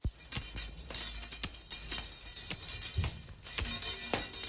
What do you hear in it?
AUDEMAR Emma Devoir3 tinker bell
For this song, I chose to turn to little bells. With this, I added steps. The objective is to create the idea that a little fairy is hiding precipitously following the arrival of a human in the room.
bell, ding